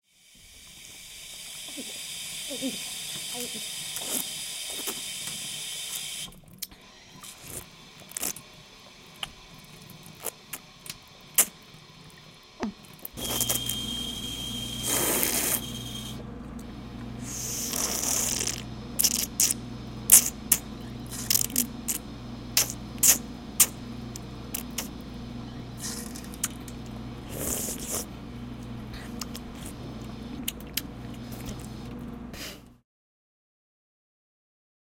Person drk frm fount
Human drinks from water fountain.
drink, fountain